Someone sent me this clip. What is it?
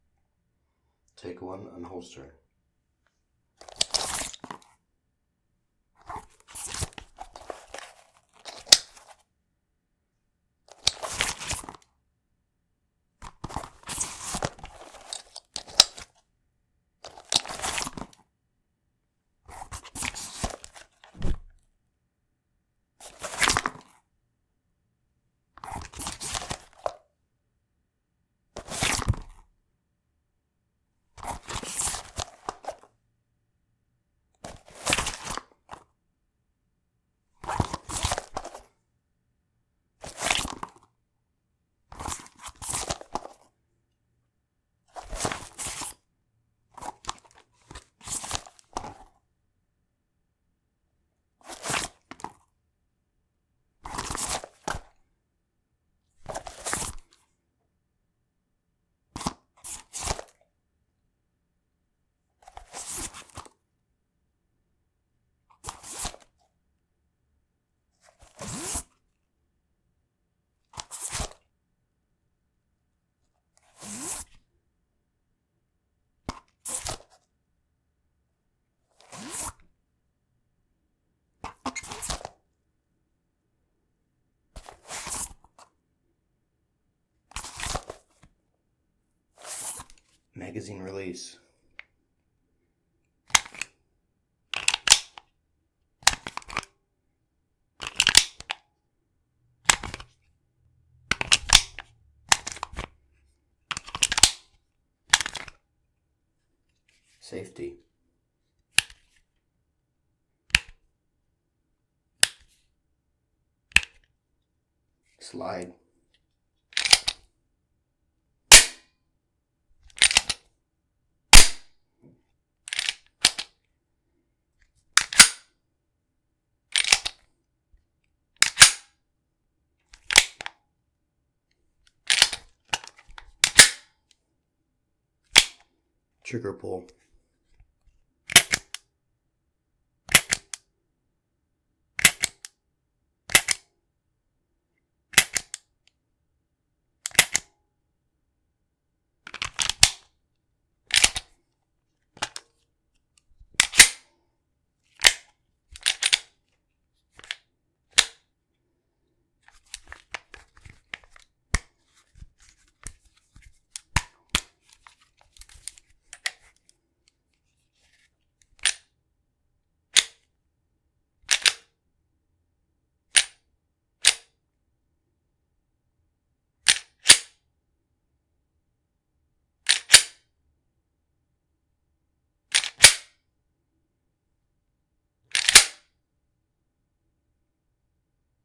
Taurus G2c Uncut Foley
Full uncut foley of my 9mm Taurus G2c. Multi-Purpose. Recorded indoors using a Blue-Yeti microphone. Cleaned in Audacity.
holster, 9mm, zip, reload, weapon, game, military, gun, pick-up, unzip, Taurus, click, slide, velcro, glock, shooter, war, equipment, belt, handgun, fabric, inspect, backpack, foley, inventory, pistol, police, firearm